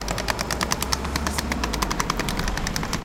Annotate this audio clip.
You can hear the noise of a suitcase with wheels going in the street.